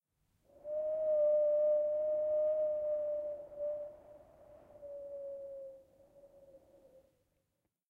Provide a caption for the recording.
Wind whistling indoors - flat.